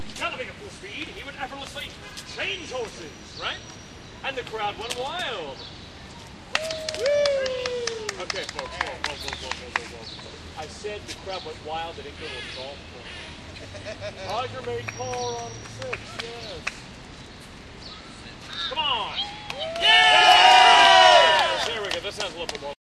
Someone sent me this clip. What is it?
philadelphia independencehall rear
Behind Independence Hall in Philadelphia recorded with DS-40 and edited in Wavosaur.
city, field-recording, independence-hall, philadelphia